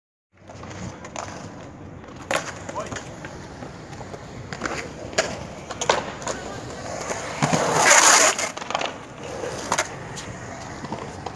A fs powerslide done with a skateboard on polished concrete, emiting that classic scratchy delicious sound